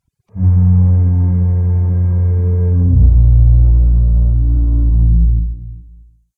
I created this fog horn effect from a sound that I discovered. After processing it, I got this.
air, boat, dock, fog, horn, tug